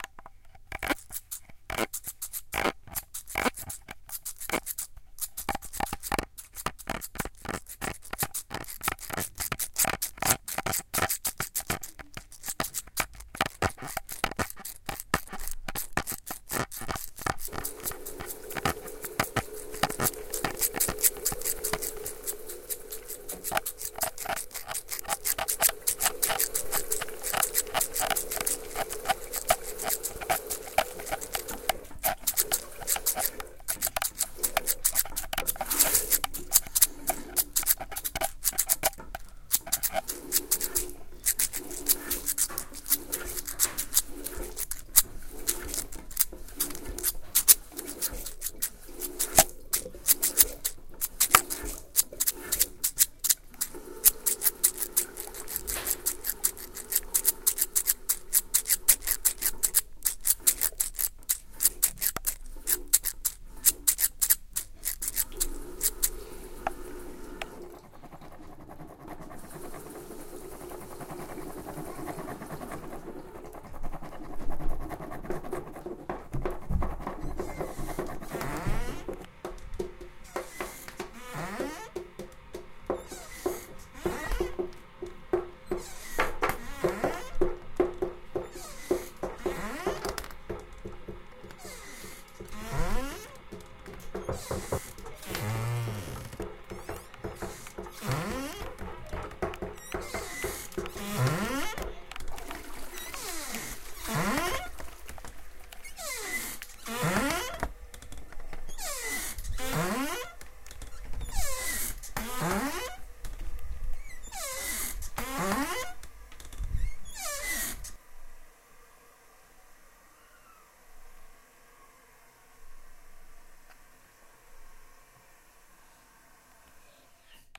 Sonic Postcard AMSP Nataly Eric
AusiasMarch, Barcelona, CityRings, SonicPostcard, Spain